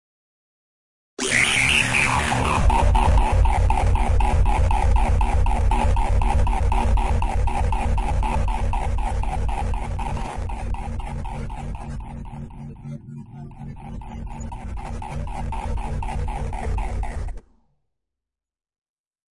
Synthetic " Munching " sound. Or so it sounds like to me! Use the sample for whatever comes to mind! Enjoy!
Machine, Machinery, Mechanical, Sci-fi, Synthetic